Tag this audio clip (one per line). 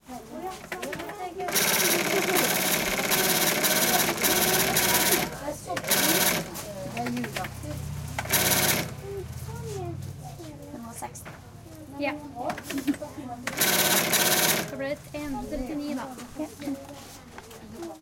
counter
field-recording
shop
store